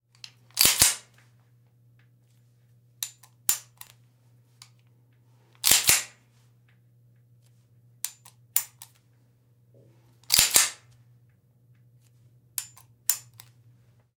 Gun racking back
Putting my Springfield Armory GI .45 1911 handgun into condition one.
pistol, load, condition-one, cock, racking, cocking, gun, 1911, handgun, loading